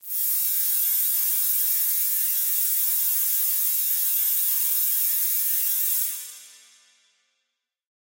SteamPipe 1 Mechanical G#5
This sample is part of the "SteamPipe Multisample 1 Mechanical" sample
pack. It is a multisample to import into your favourite samples. The
sample is a sound that in the lower frequencies could be coming from
some kind of a machine. In the higher frequencies, the sound deviates
more and more from the industrial character and becomes thinner. In the
sample pack there are 16 samples evenly spread across 5 octaves (C1
till C6). The note in the sample name (C, E or G#) does not indicate
the pitch of the sound but the key on my keyboard. The sound was
created with the SteamPipe V3 ensemble from the user library of Reaktor. After that normalising and fades were applied within Cubase SX & Wavelab.